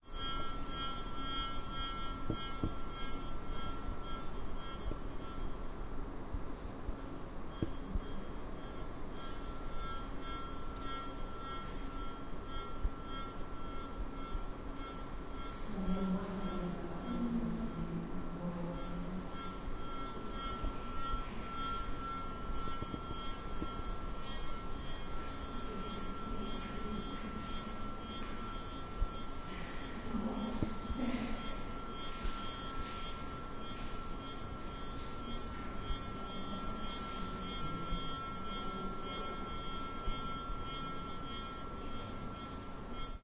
Air Conditioner, Rijksmuseum, Amsterdam, NL
Weird sounding air-con at the Rijksmuseum! Could be useful in constructing some weird sounds??
vent, air, con